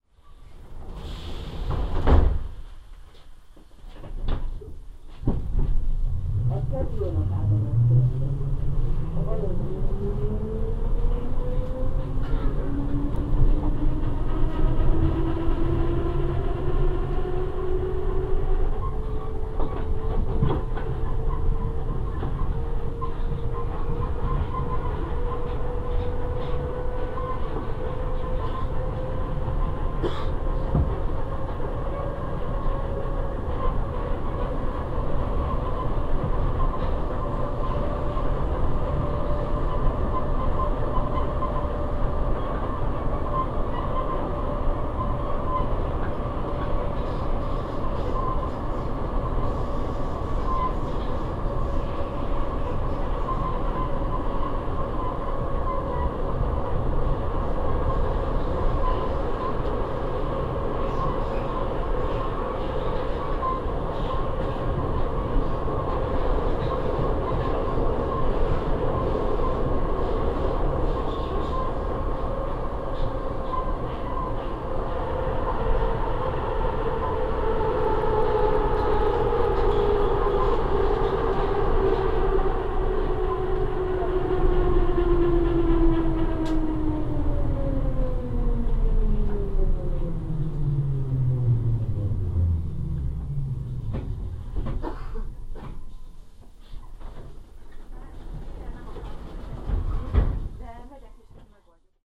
Another recording of EVA, a russian type of subway train on Budapest Metro Line 2. Recorded by my MP3 player.
subway, train, underground, railway, transport, rail